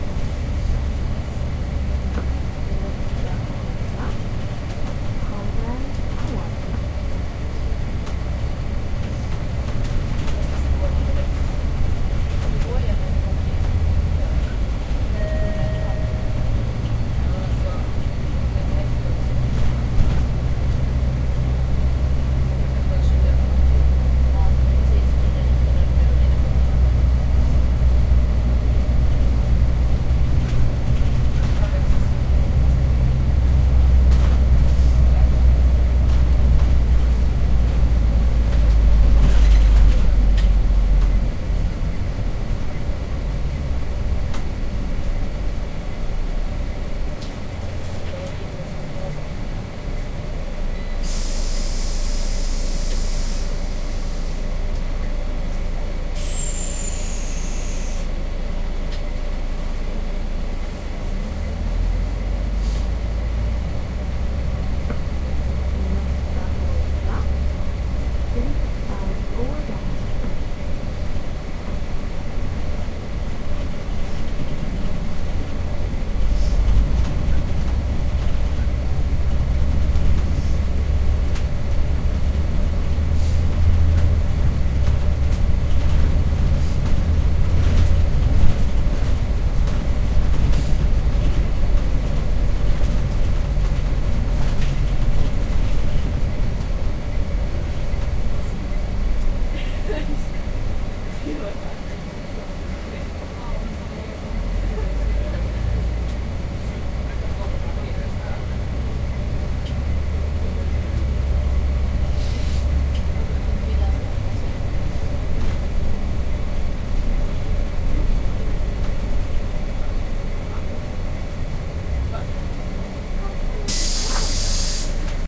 A sound of driving a bus.
bus, driving, people